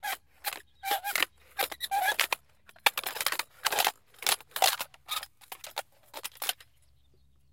Sound taken during the international youth project "Let's go urban". All the sounds were recorded using a Zoom Q3 in the abandoned hangars U.S. base army in Hanh, Germany.
ambient, dark, deep, drone, effect, experimental, fx, germany, hangar, pad, recording, reverb, sampled, sound-design, soundscape, zoomq3